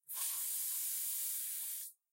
The sound of an aerosol can being used, can be used to simulate steam.
Aerosol, deodorant